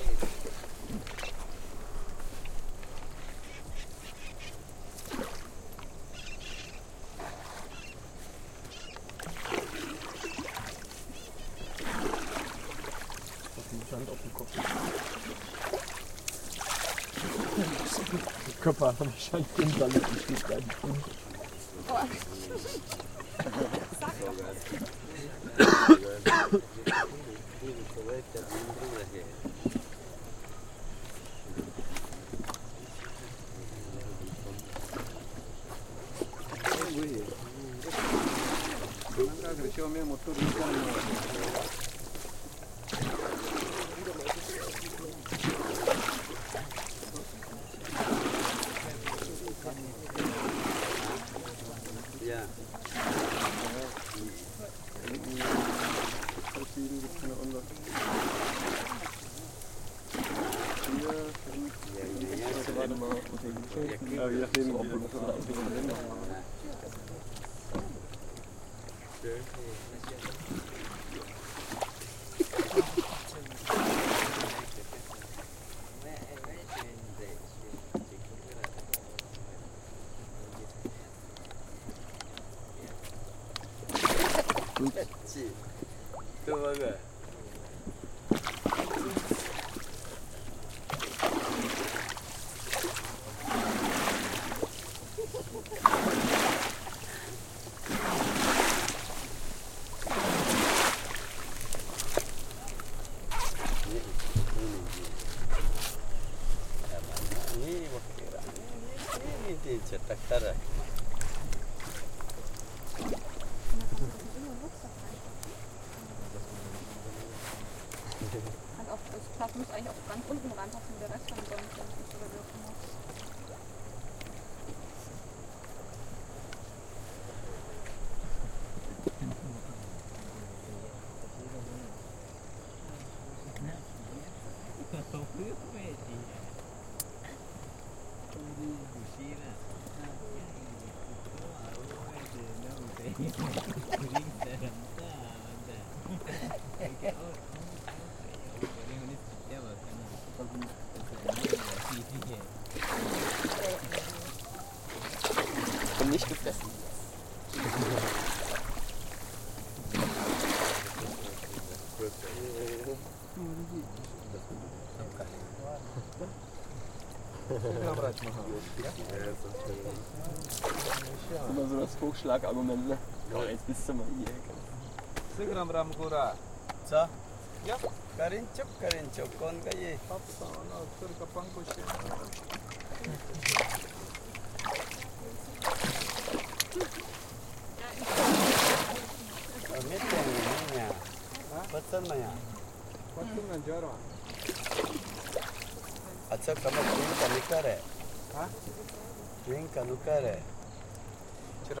Recorded in 2011 on the documentary "Arutam" project. With a Rode Stereo XY mic thru a Boom. This one was recorded on a float doing a little swimming with piranias :)
ambience, atmo, atmosphere, boat, ecuador, equador, field-recording, Float, jungle, Mike-Woloszyn, primary-rainforest, rainforest, River, stereo, Tunk, Water, Woloszy, Woloszyn-Mike